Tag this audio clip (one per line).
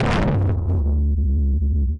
analog
analouge
artificial
atmosphere
blast
bomb
deep
filterbank
hard
harsh
massive
perc
percussion
sherman
shot